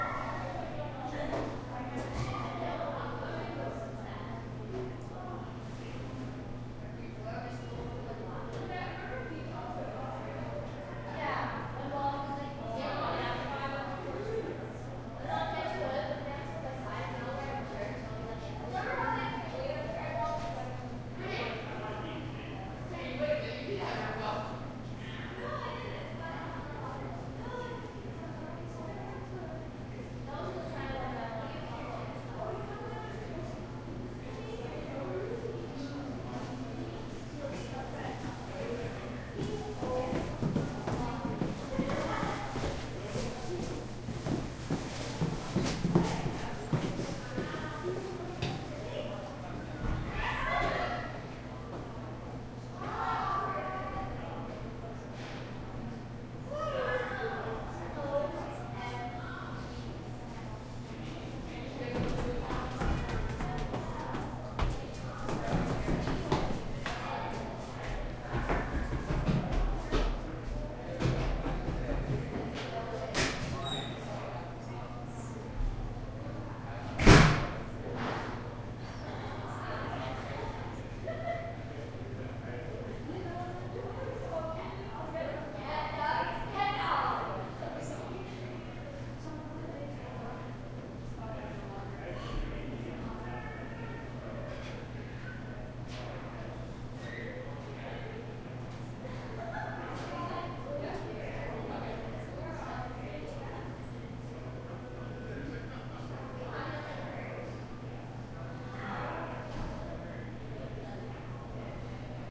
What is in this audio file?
stairway high school distant voices echo and ventilation hum +steps kid run by down stairs
high, stairway, echo, school, voices, distant